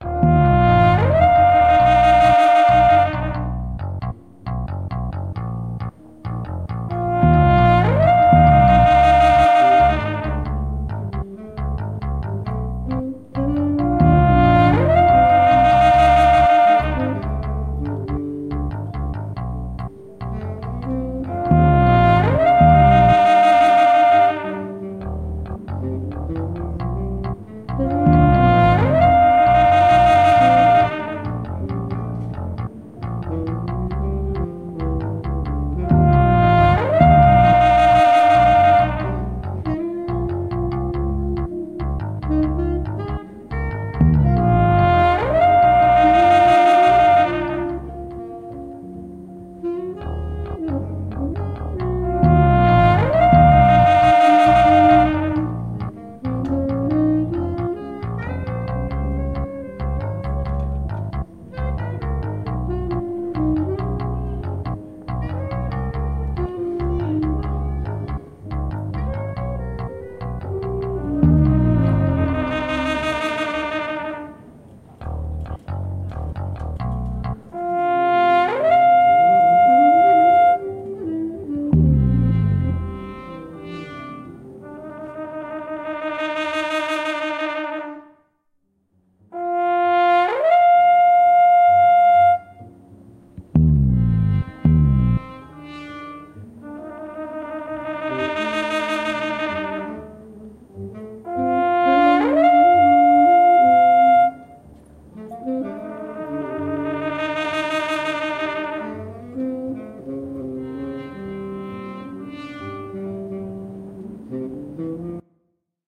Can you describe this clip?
Jazz Improvisation
Fusion, Indie, Jazz